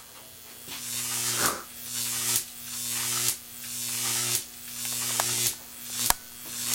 Short recording of a Jacob's Ladder constructed by a friend of mine.
This was taken from the audio track of a video shoot. Recorded with the internal microphone of a Sony DCR-TRV8 Handycam.
Still frame from the video: